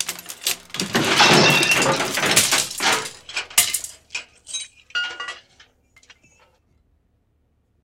built for a show called Room Service, this cue was one of three choices for an effect. It has coathangers, boxes, etc crashing down. Some glass added
walking, closet, into, a